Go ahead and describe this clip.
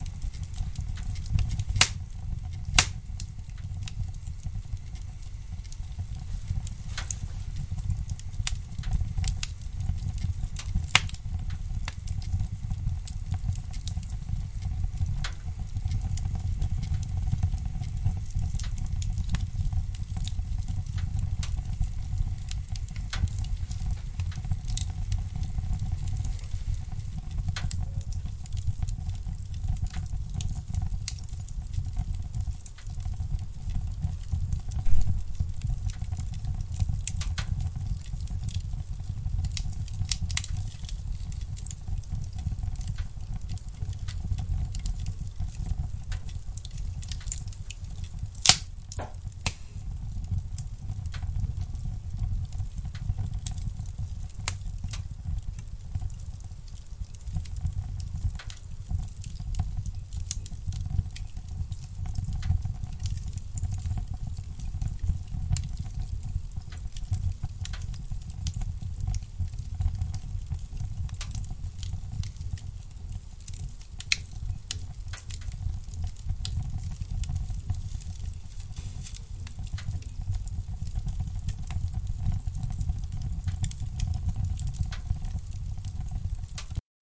hearth fire / soft
almost clean sound / dry
recorder As I remember on light semi profi microphone, little post production
recorded fire in hearth
ambience, fire, hearth